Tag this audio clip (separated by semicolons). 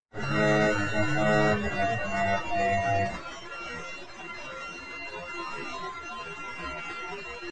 abstract; broken; digital; electric; freaky; futuristic; glitch; machine; mechanical; noise; sound-design; strange